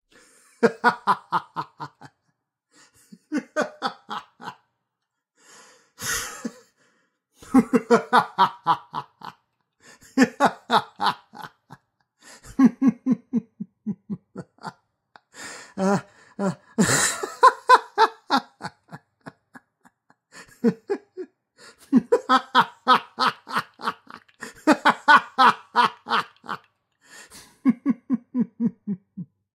Male (18-50) laugh.
Human, Laugh, Laughing, Laughter, Male, Voice